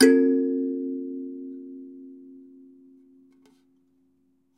Totally awesome Kalimba, recorded close range with the xy on a Sony D50. Tuning is something strange, but sounds pretty great.